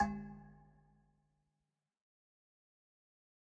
Metal Timbale 005
drum, god, home, kit, pack, record, timbale, trash